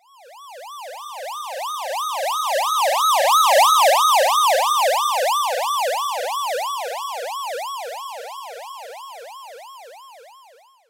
Siren Doppler
A synthesized siren sound approaches and departs with doppler (pitch shifting) effect. No atmos. No L/R panning.